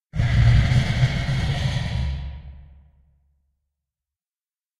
The joys of voiceover work...
Edited recording of adjusting a metal mic stand in the voiceover booth pitched down and with added reverb to make it sound like some sort of demonic creature's roar.
Original recording was made with a Rode Broadcaster mic into a Lenovo desktop computer via a Rodecaster Pro mixer and then edited in Adobe Audition.